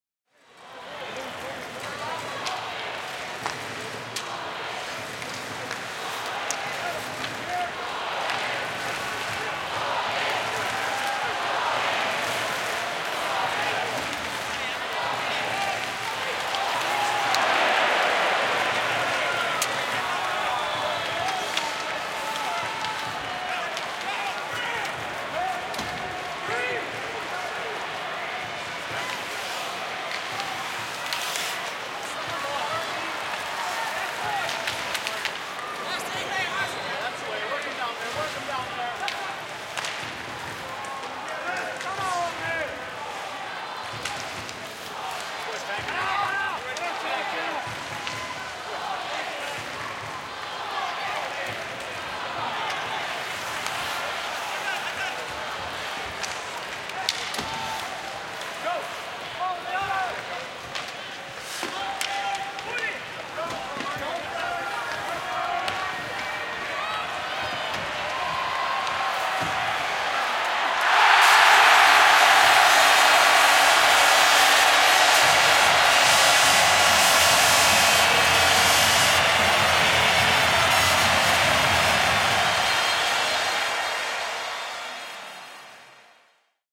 Jääkiekko, ottelu, maali / Ice hockey, match in the ice stadium, Finland - US, audience supporting, shouting, clapping, sounds of the game, goal, crowd shouting loud (WC-tournament 1997, Helsinki)
Ottelu jäähallissa, Suomi - USA. Yleisön kannustusta, huutoa, taputuksia. Pelin ääniä. Maali, kovaa huutoa. (MM-kisat 1997, Helsinki).
Paikka/Place: Suomi / Finland / Helsinki
Aika/Date: 09.05.1997
Audience, Crowd, Field-Recording, Finland, Finnish-Broadcasting-Company, Game, Goal, Huuto, Ice-hockey, Ice-stadium, Kannustus, Katsomo, Kiekko, Maali, Match, Ottelu, Shout, Soundfx, Sport, Suomi, Support, Tehosteet, Urheilu, Yle, Yleisradio